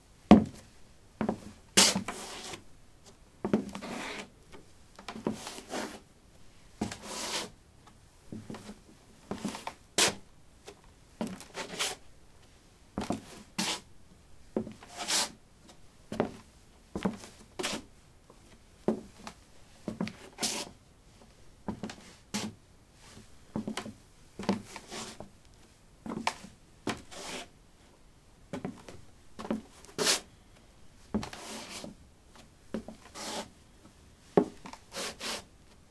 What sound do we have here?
wood 06b ballerinas shuffle
Shuffling on a wooden floor: ballerinas. Recorded with a ZOOM H2 in a basement of a house: a large wooden table placed on a carpet over concrete. Normalized with Audacity.